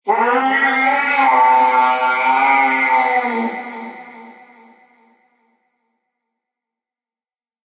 Baby dinosaur cry.
Several filters from Guitar Rig Pro and Samplitude 17 applied to a cat cry registered with my Audio Technica ATM33a.
growl, monster, baby, dinosaur